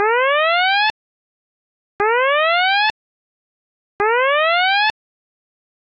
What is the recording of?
jsyd klaxon
Synthesized version of a klaxon sound effect used in the 1960s star trek show. I analyzed a recording of the original sound and then synthesized it using my own JSyd software.